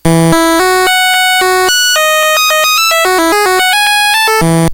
these are from LSDJ V 3.6 Compliments of a friend in Scotland.
Song 1 - 130 BPM
Song 2 - 110
Song 3 - 140
Take them and EnjoI the rush~!